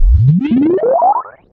Synth Loop 26 - (90 BPM)
synthesizer, moog, scoring, electronic, sound-effect, synth, analog, soundesign, water, sci-fi, fx, bubbles, sweep, swoop, retro